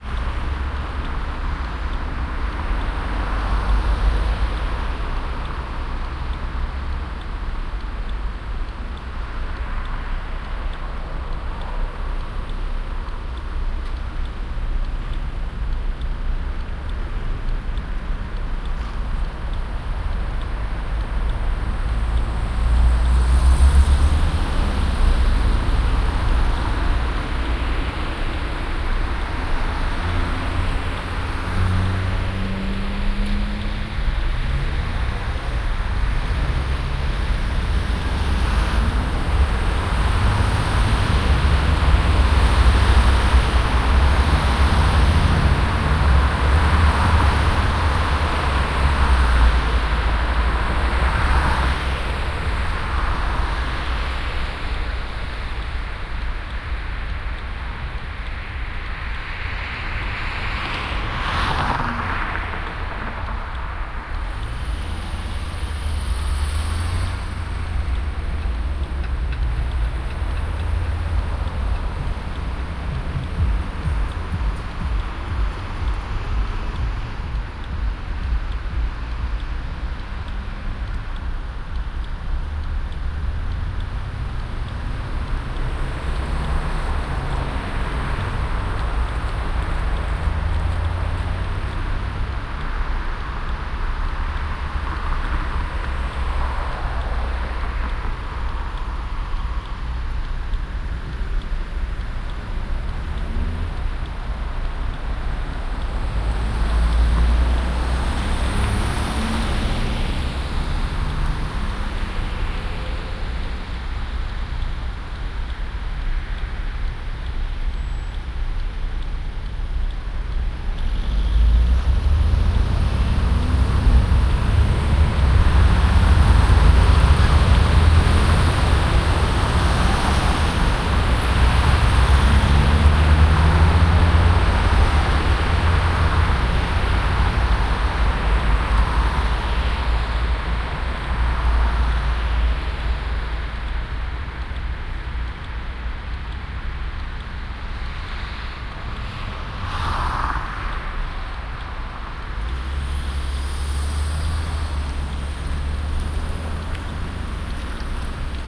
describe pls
201002071700-Kreuzung-wenig-Verkehr
Binaural recording. Used in-ear microphones. It's the ambient sound of a rather big crossroads in Munich, but with very few Sunday traffic.
field-recording, binaural, ambient, crossroads, traffic